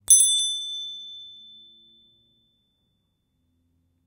A beats of small bronze bell. The bell painted with oil paint.
See also in the package
Recorded: 03-02-2013.
Recorder: Tascam DR-40